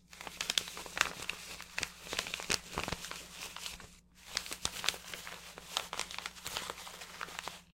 rustling fabric and paper
recorded for a character taking something out of their pocket
clothes, crinkle, jeans, plastic, pocket, rub, rustling, scratch